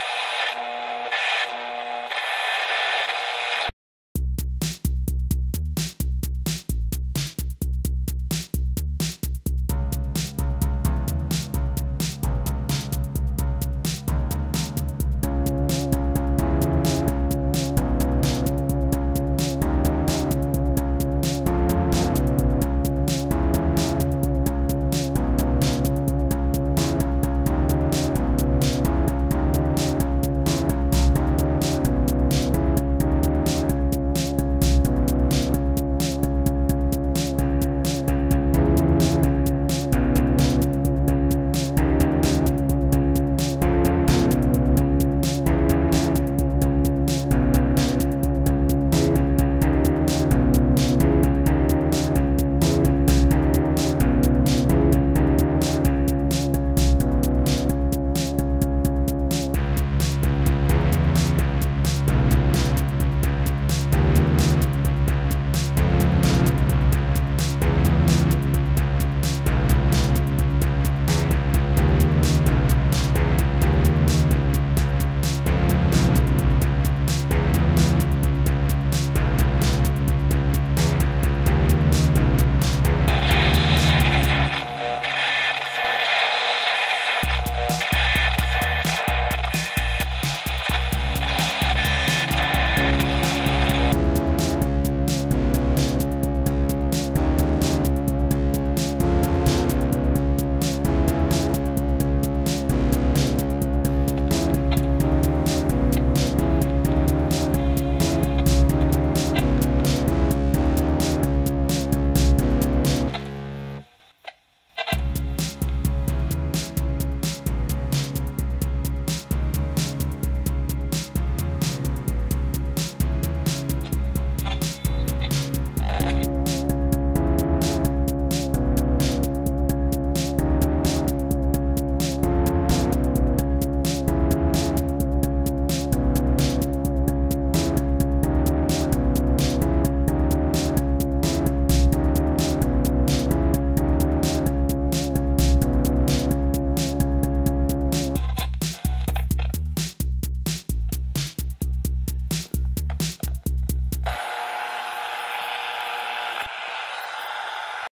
golden offspring
SUN, future, radio, sounds, space, star, wave